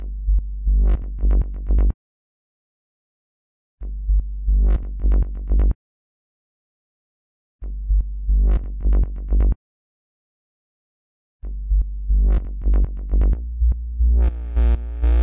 aisha 09 24 10 126bpm bass bursts B
This is a synthesized bass loop I made using Ableton Live.